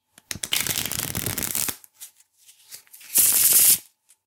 Riffle Card Shuffle
This is me doing a standard riffle shuffle on an ordinary pack of playing cards
Recorded with Sony HDR PJ260V then edited with Audacity
shuffle, sound, a, cards, standard, shuffling, riffle, playing, card